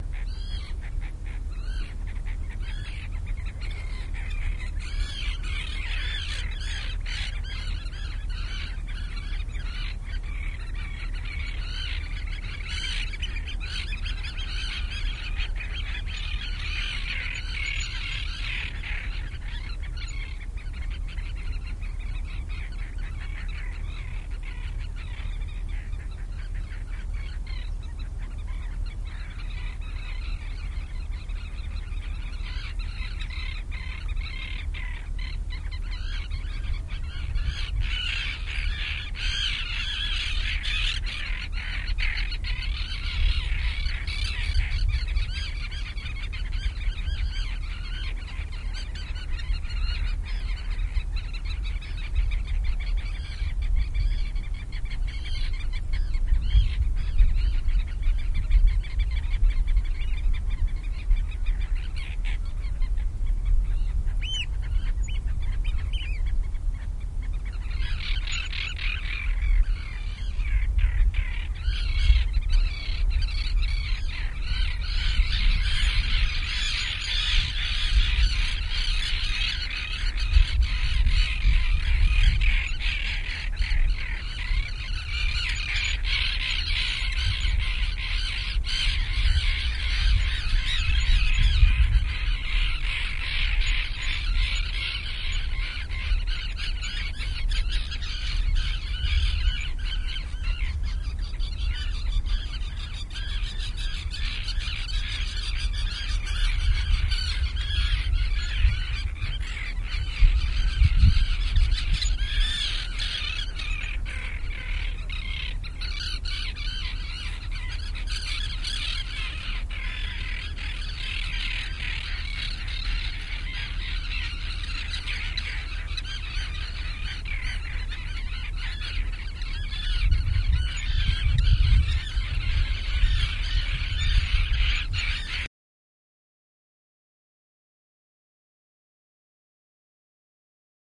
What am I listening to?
seagulls near sea
Some seagulls at the shore of the Jadebusen, which is part of the Northsea. The recording was done with a Sharp minidisk player IM-DR420H and the soundman OKM II in June 2004.